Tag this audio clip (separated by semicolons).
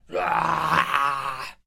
dying; scream; screaming